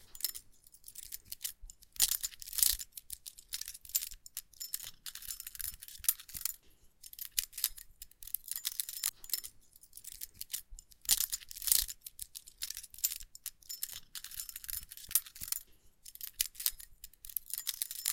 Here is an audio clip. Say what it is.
Misc Lock Picks
Sound of lock picks being moved
pick, door, picking, key, padlock, gate, shut, locks, lock, open, unlock, locking, closing, close, opening, unlocking, keys